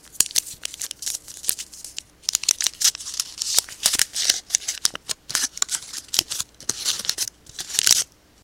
Recorded CandyWrapper02
Opening up some candy from the wrapper.
candy, wrapper